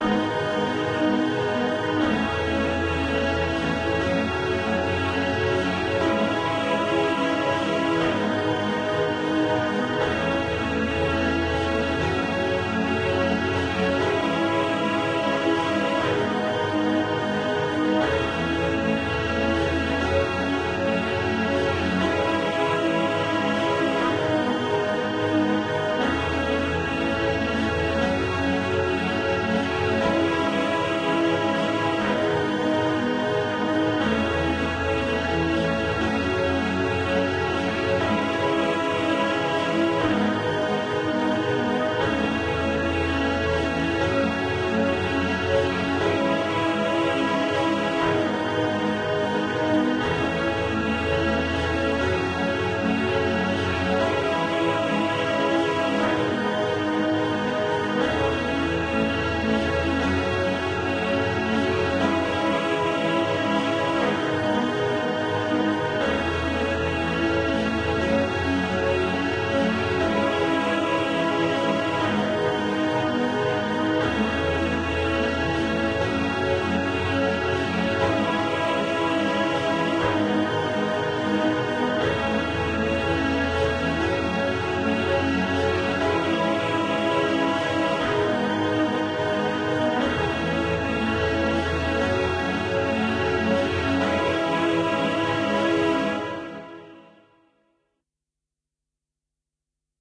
Kazoo synth loop 001 wet 120 bpm
synthetyzer, bpm, kazoo, synth, loop, 120bpm, 120